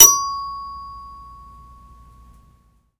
My toy piano sucks, it has no sustain and one of the keys rattles. This really pisses me off. So I hit the working keys like an xylophone for those unimpressed with my other versions.